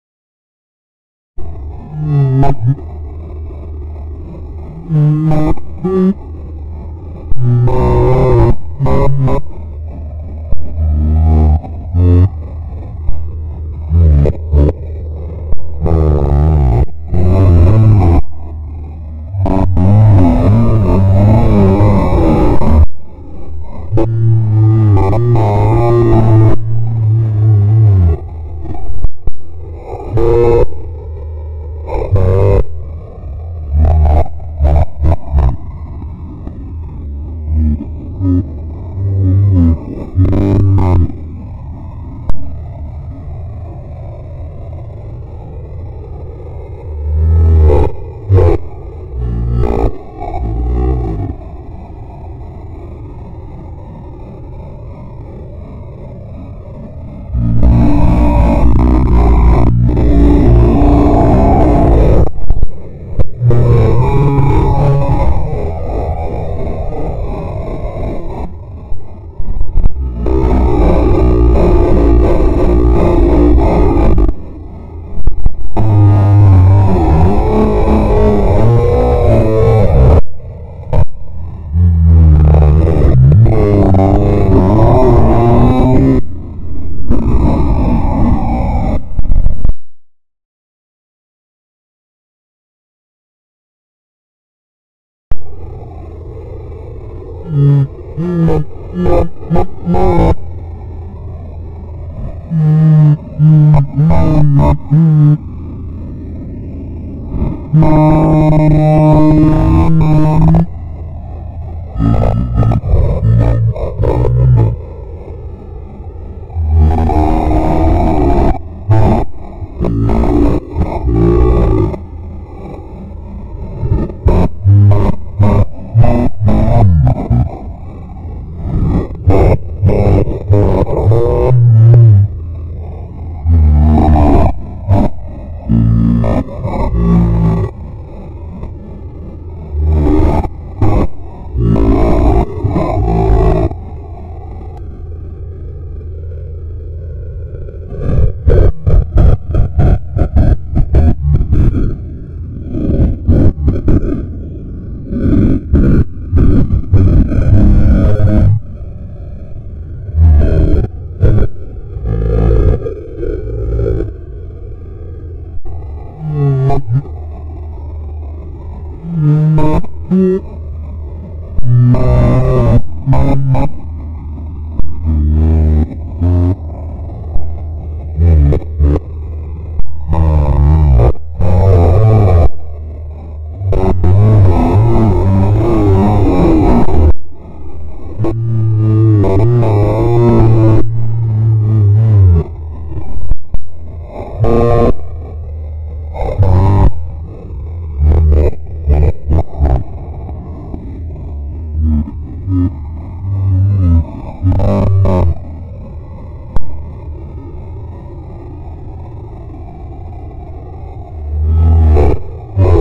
The Complaining Corpse
Played around with some speech samples. Been leery of adding any kind of percussion to things but maybe someone can use a few seconds of this.
haunting, speech, distortion, ghost, disturbing, electronic, incomprehensible, paranormal